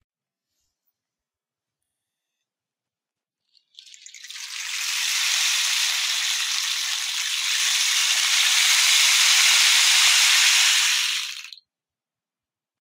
Rolling pebbles enhanced 4
These sounds are produced by the instrument called rainstick. It has little pebbles inside that produce some interesting slide noises when held upside down or inclined.
I hope they can help you in one of your projects.
recording, Indoor-recording, device, format, rainstick, instrument, smartphone, handheld, LG